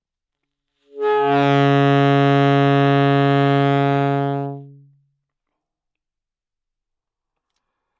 Part of the Good-sounds dataset of monophonic instrumental sounds.
instrument::sax_alto
note::C#
octave::3
midi note::37
good-sounds-id::4707
Intentionally played as an example of bad-attack